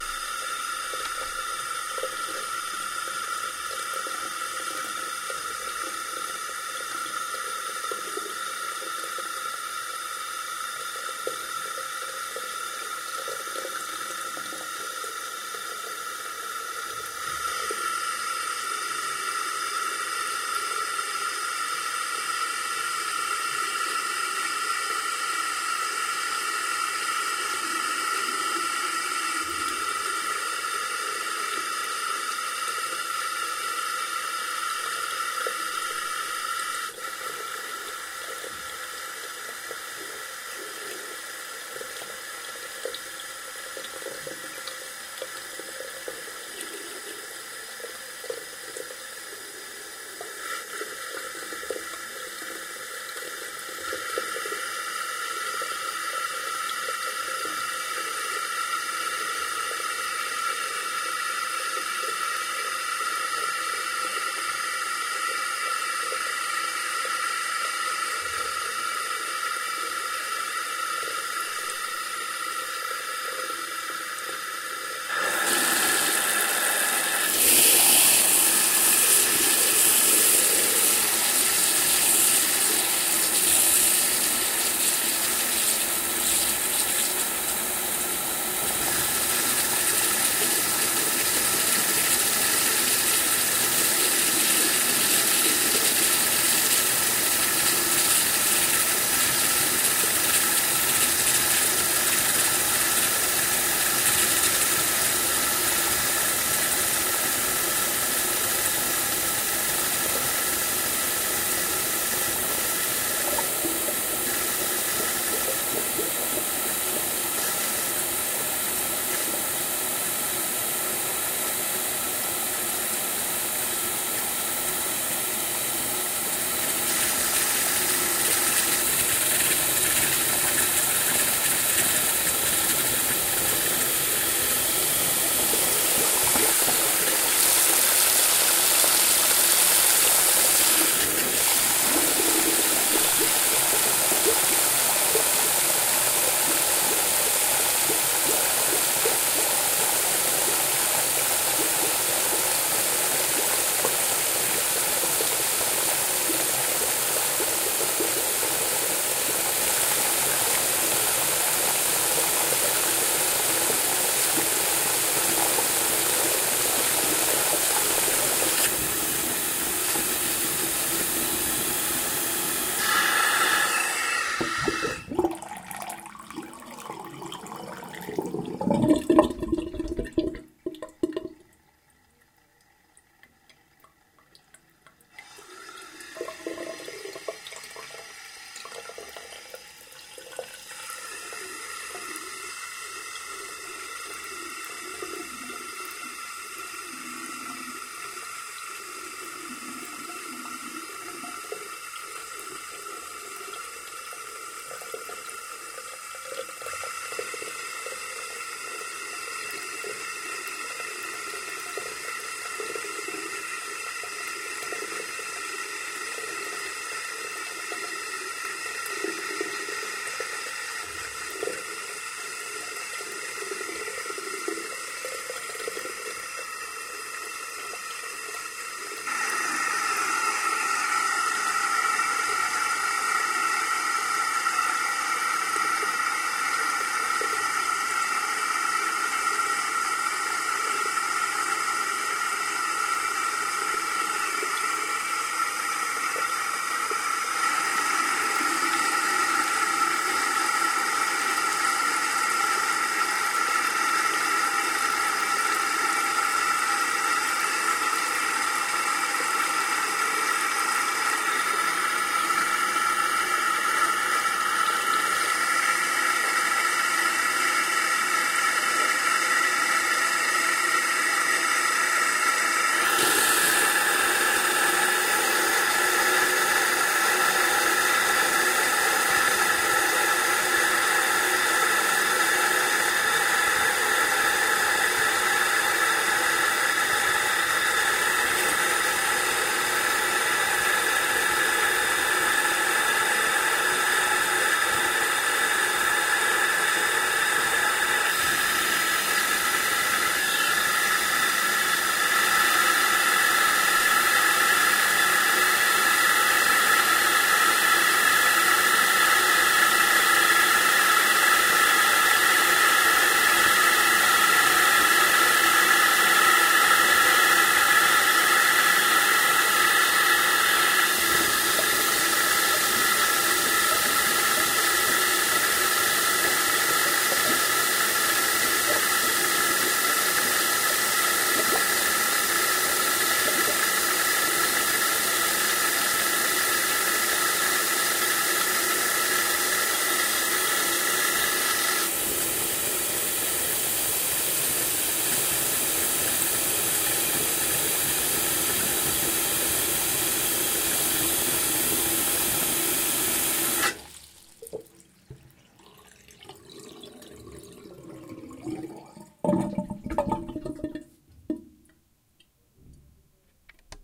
Tap water-20120409-212954
Tap water running with economizer, at different temperatures and pressures. Includes tube gurgling when turned off. Recorded with Tascam DR-40 internal mics Stereo X-Y.